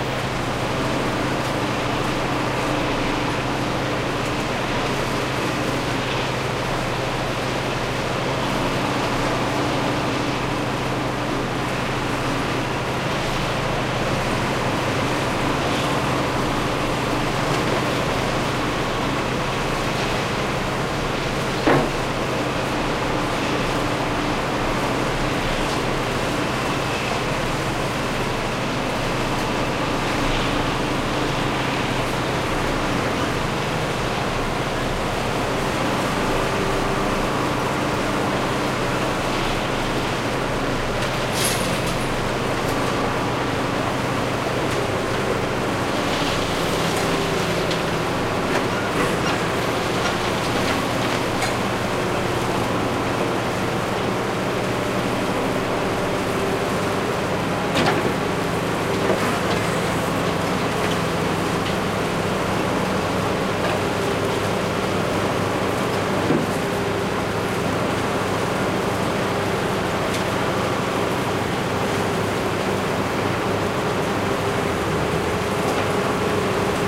quarry close up
Record of the machine destroying rocks to do gravel and sand. It' s interesting to listen to the 5 other members of the pack. They're all confined in the same geottaged area, the quarry on river Sabac near Belgrade Serbia. Recorded with Schoeps M/S mikes during the shooting of Nicolas Wagnières's movie "Tranzit". Converted to L/R
belgrade, noise, quarry, sabac, serbia, soundfield, soundmark, soundscape, tranzit, truck